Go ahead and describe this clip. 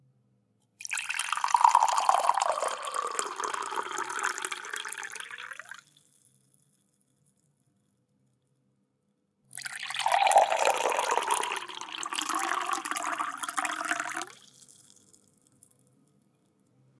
Water is pour into glass
agua, drink, liquid, poured, tea, water